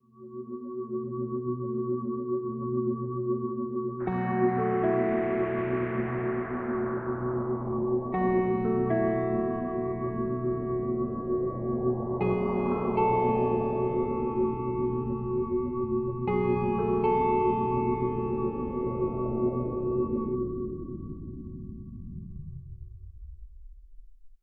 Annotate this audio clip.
Background atmospheric loop
Soothing, Atmosphere, piano